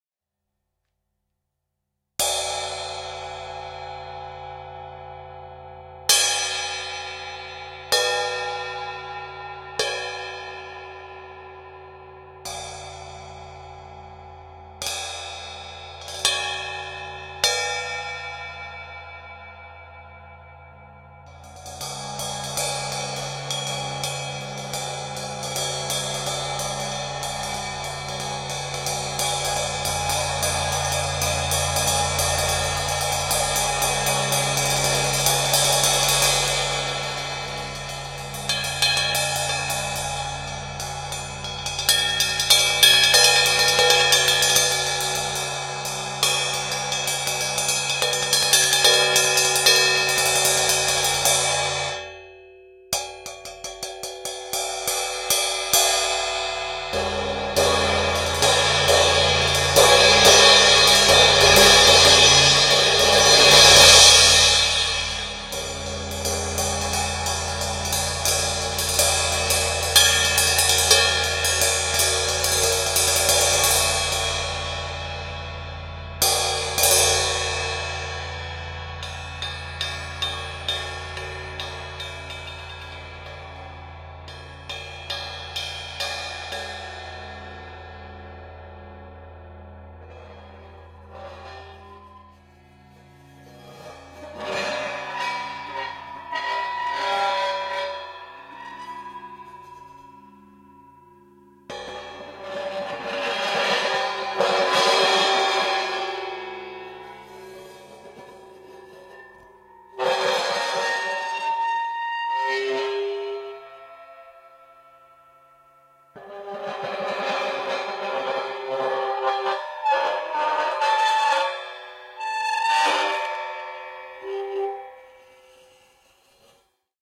Vintage cymbal from the 50s, 60s, 70s? I'm demo playing it. Mono recorded in a dry room (Q-Factory rehearsal space in Amsterdam) with Shure SM58 going into MOTU Ultralite MK3.